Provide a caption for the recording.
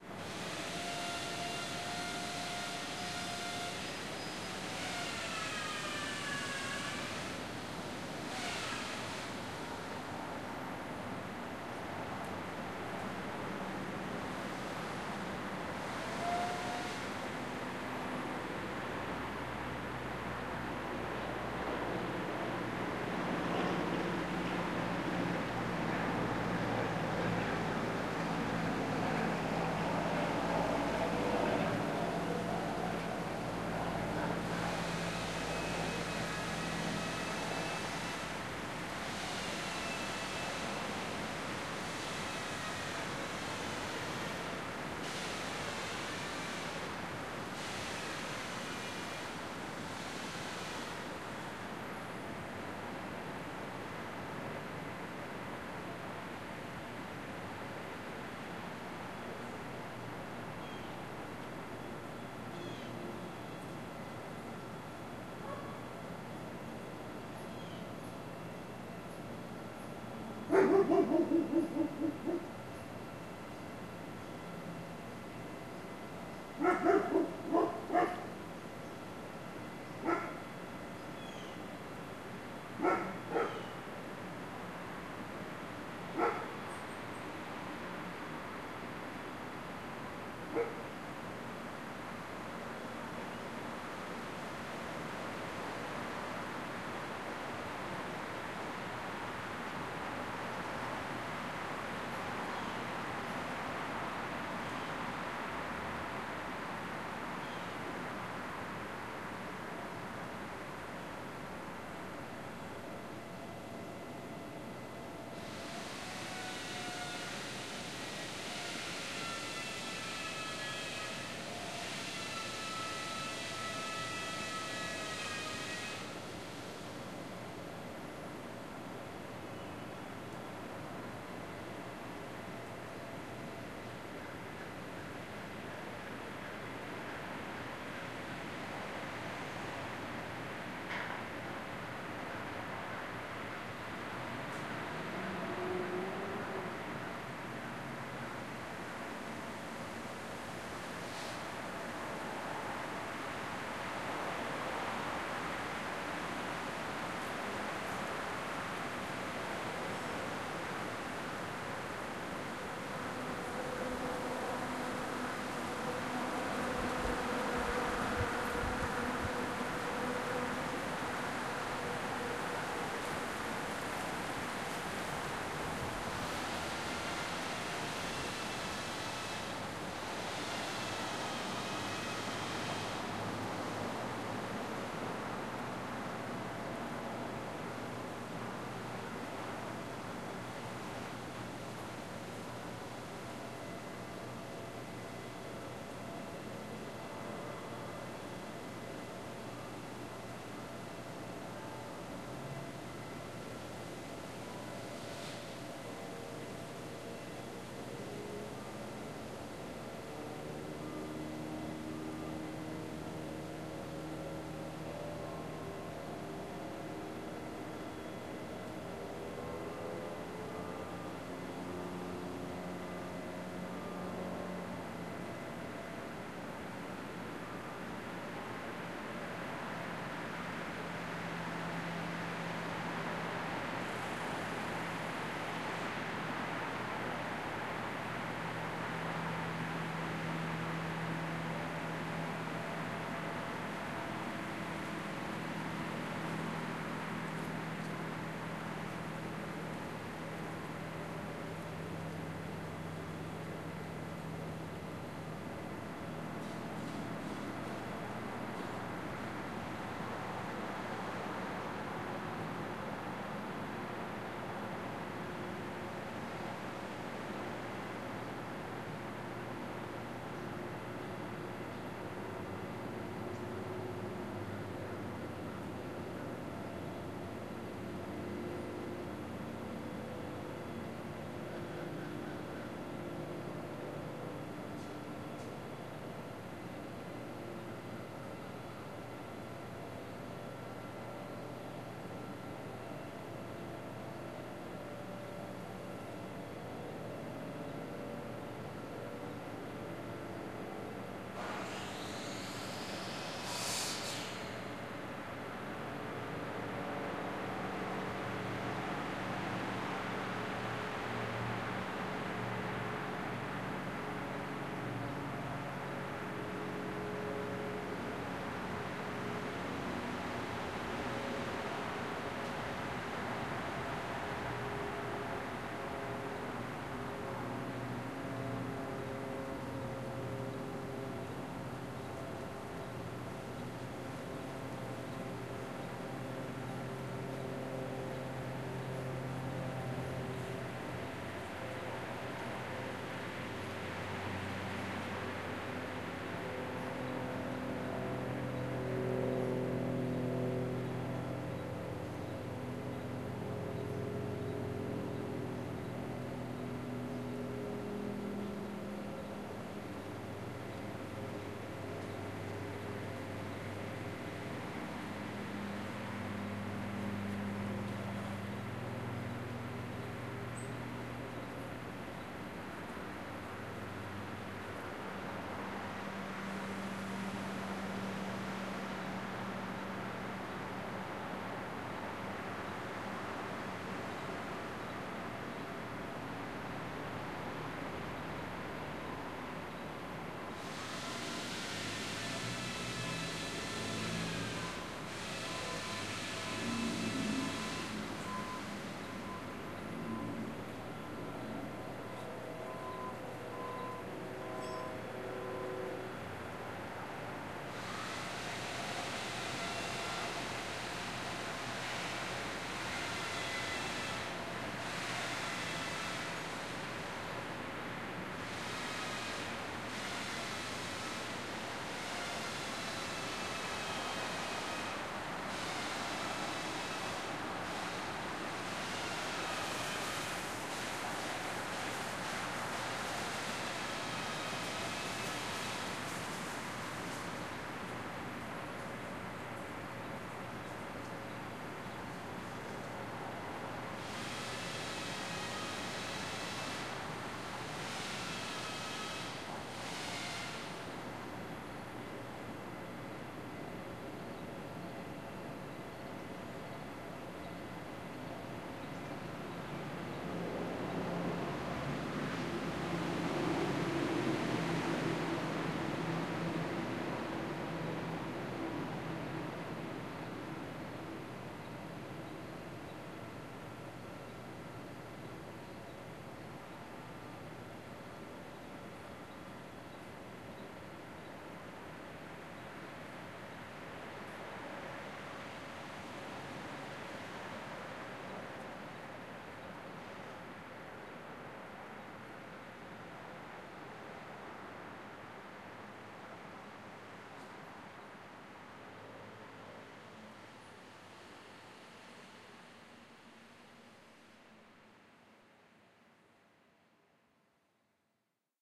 Saws buzzing in busy neighborhood wood shop
The sound of saws cutting wood in a residential neighborhood woodshop. Also, birds chirping, traffic passes, and an occasional prop plane can be heard faintly overhead.
ambience, ambient, birds, circular-saw, construction, cut, cutting, electric-saw, field-recording, hardware, lumber, neighborhood, saw, skil-saw, traffic, wood, woodshop, woodworking